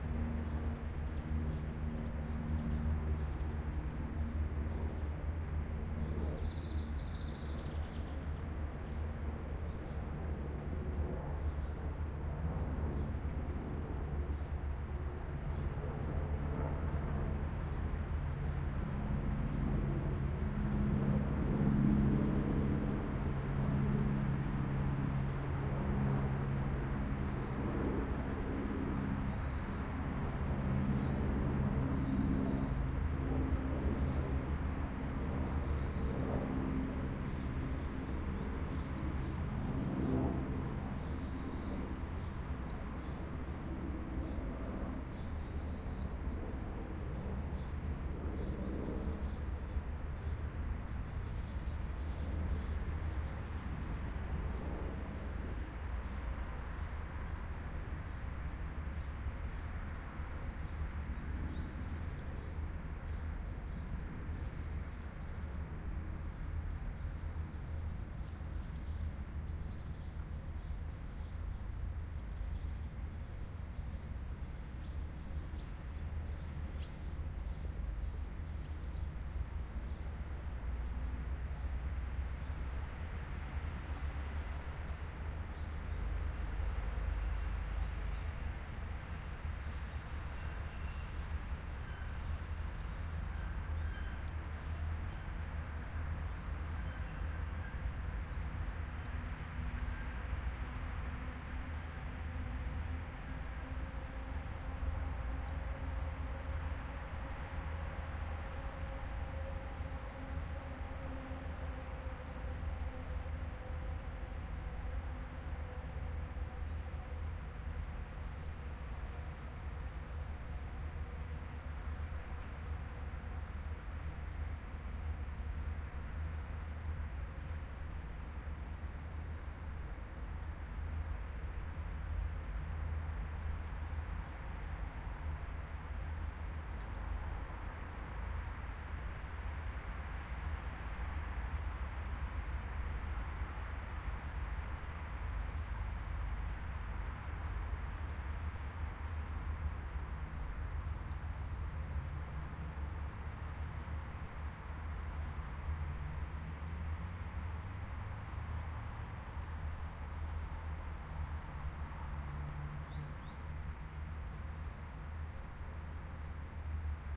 City Park with birds
birds, Bus, City